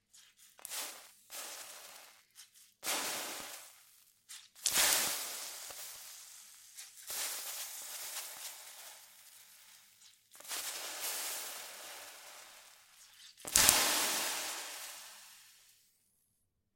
20180109 Evaporating water
onesoundperday2018, steam, water, hiss, evaporation, heat, hot, evaportaing